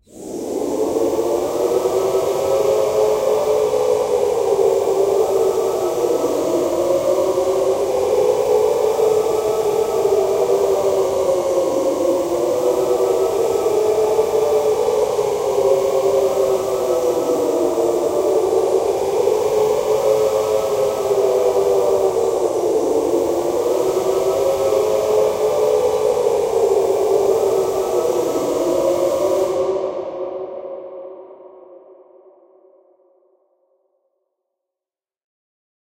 Moaning of the Dead, A
I took one of my recordings of a small drone, threw it into Kontakt, played several slower samples of it and added a bunch of wet reverb. The result comes straight from Hell, enjoy.
An example of how you might credit is by putting this in the description/credits:
The sound was recorded using a "H1 Zoom recorder" on 5th December 2017, also with Kontakt and Cubase.
souls, soul, moaning, moan, groan, ghostly, haunted, dead, creepy, horror, hell, scary, drone